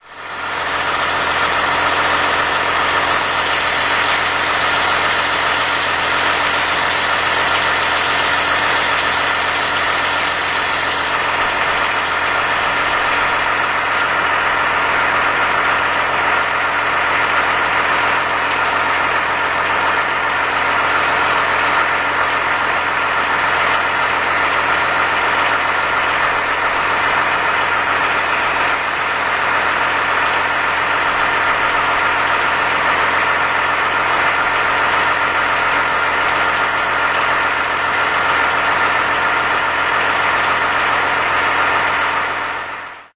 crane lorry 220910
22.09.2010: about 20.00. crossroads of Taczaka and ratajczaka streets in Poznan. the sound of engine of crane lorry standing by pedestrian crossing. There was no driver inside.
engine, crane, noise, street, poznan, lorry, poland, crane-lorry